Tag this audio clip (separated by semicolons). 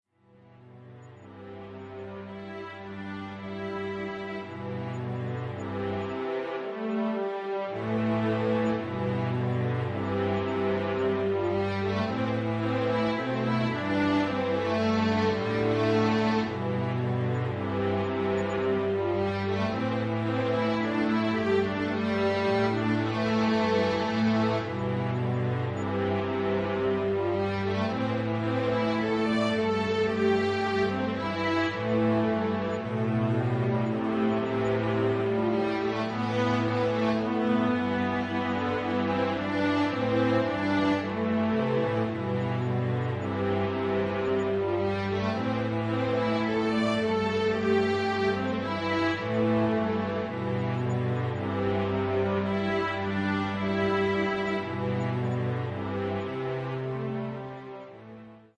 ambient; background; calm; renaissance; rpg